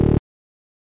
⇢ GREAT Synth Bass 1 Top
Synth Bass. Processed in Lmms by applying effects.
bass-dubstep, lead, bass-synth, dubstep-bass, bass-dubstyle, hardstyle-synth, lead-bass, bass-hardstyle, dubstyle-bass, hardstyle-lead, synth-bass, bass, bass-lead, synth